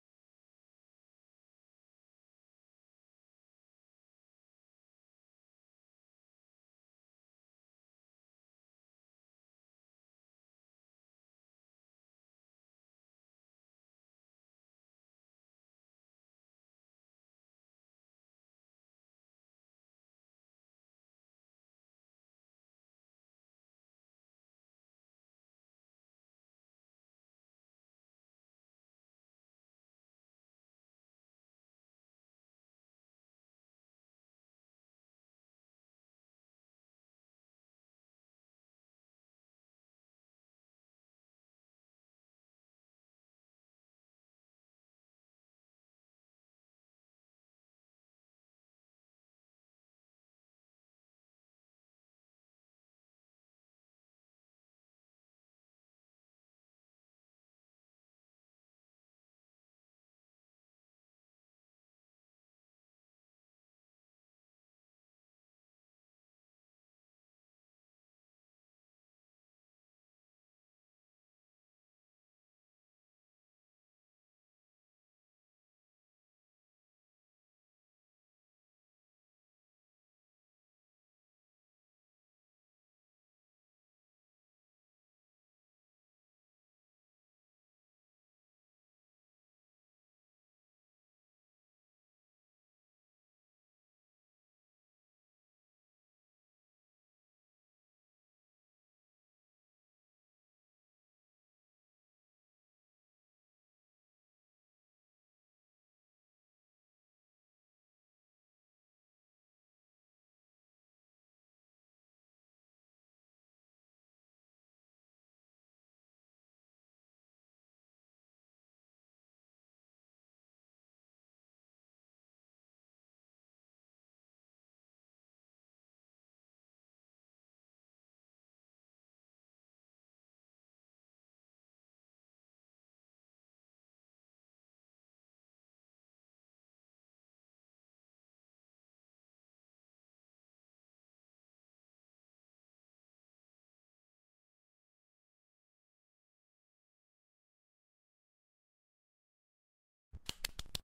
clap clap clap
festival, girl, happy